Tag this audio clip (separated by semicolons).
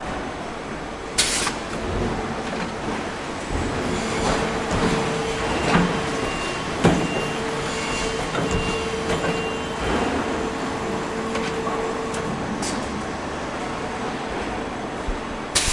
aw; Factory; field; machines; Poland; Wroc; Wroclaw